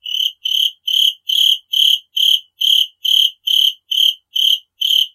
Wasn't going to happen at first, but God blessed me with getting to record crickets fairly close to my Samson C03U microphone. By the way, they were in the basement of my house.